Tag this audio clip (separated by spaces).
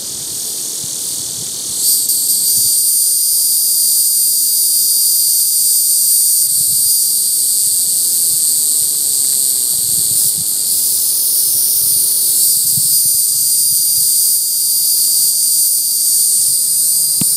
State-Park insects IRL trail Indian-River-Lagoon bugs